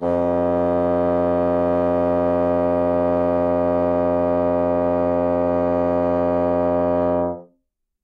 One-shot from Versilian Studios Chamber Orchestra 2: Community Edition sampling project.
Instrument family: Woodwinds
Instrument: Bassoon
Articulation: sustain
Note: E#2
Midi note: 41
Midi velocity (center): 95
Microphone: 2x Rode NT1-A
Performer: P. Sauter
vsco-2, single-note, woodwinds, midi-note-41, multisample, esharp2, bassoon, sustain, midi-velocity-95